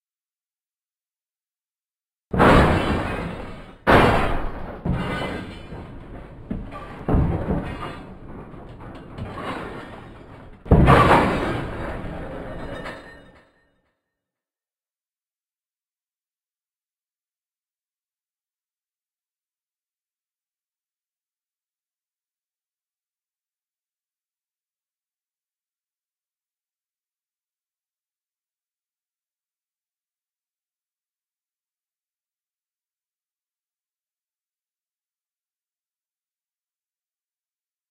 Sampler Industry Crashes
created by slowing down the sound of a train
crashing, industrial, industry, smashing